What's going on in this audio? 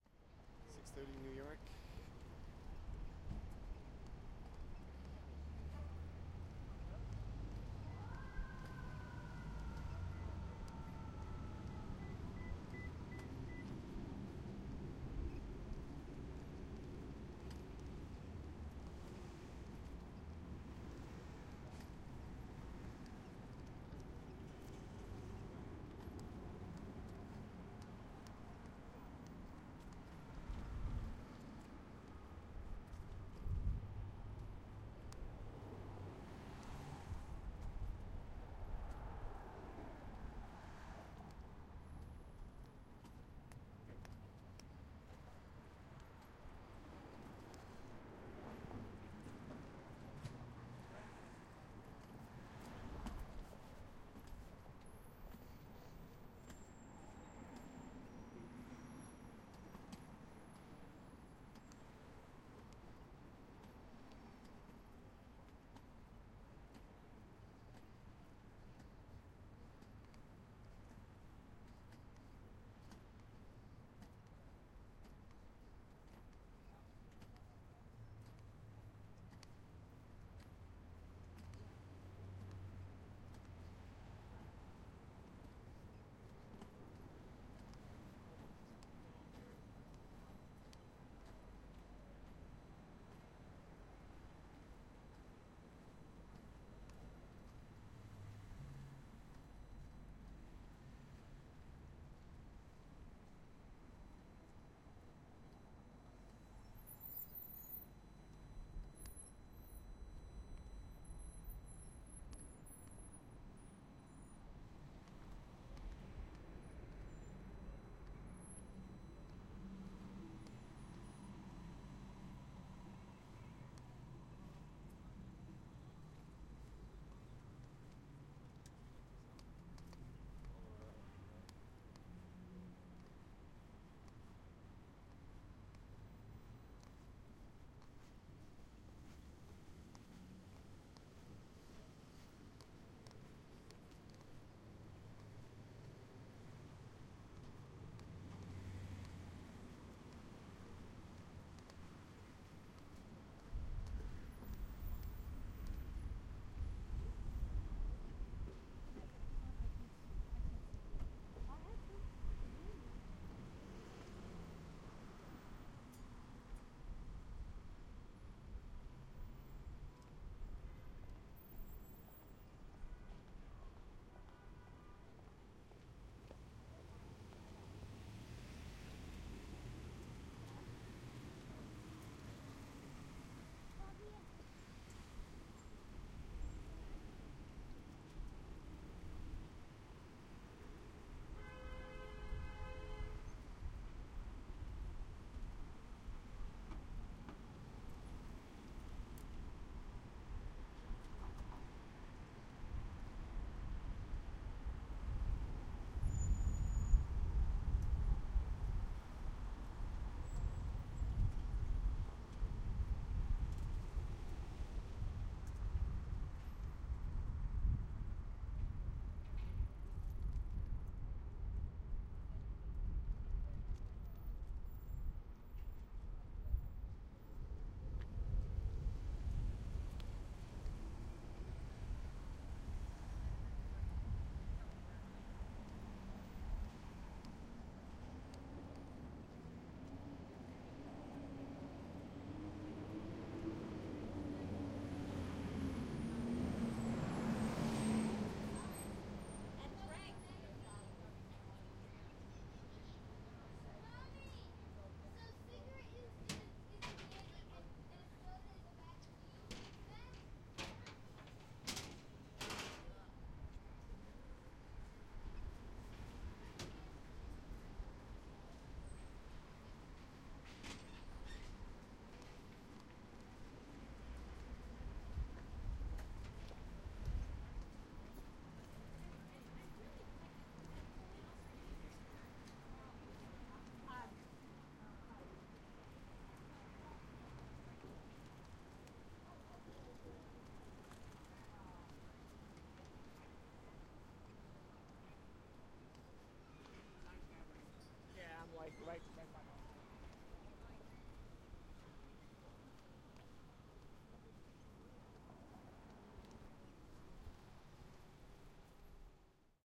rear ST NYC fall 2011 street pedestrian
New-York, NYC